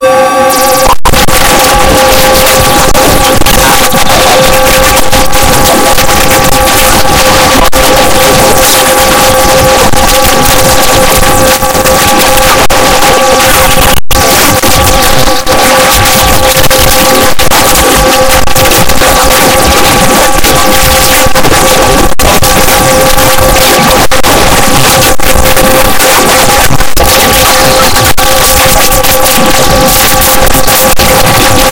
Noise MkII mark 2
very harsh glitch file